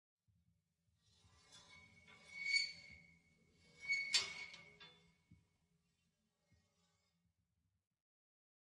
Rubbing Metal 03
Sound made by bowing a clothes hanger. Recorded with two contact microphones.